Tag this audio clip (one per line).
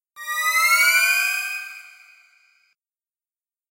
effect
gameaudio
sfx
sound-design
soundeffects